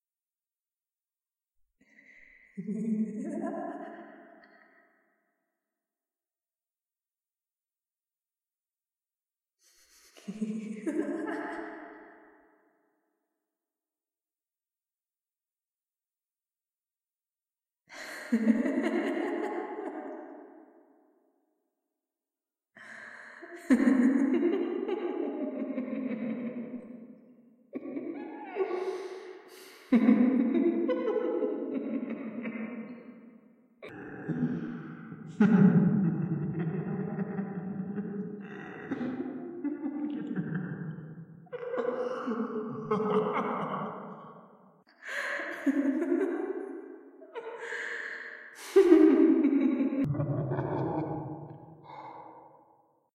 Insane Women Laughter
Insane Women's laughter edited with two low-pitched, demonic parts.
Demonic, InsaneWoman